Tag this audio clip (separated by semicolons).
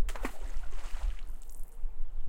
nature; splash; water